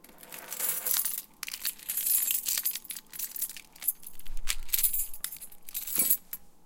Handling Keychain on Kitchen Counter
Taken at about 8am on a Friday in my home kitchen in Los Angeles, CA. I used a Tascam DR-07MK2 recorder. I simply picked up my keys from the counter and put them back down.
domestic-sounds, home, keychain, keys, kitchen, los-angeles